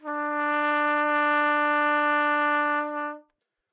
Part of the Good-sounds dataset of monophonic instrumental sounds.
sample, trumpet, single-note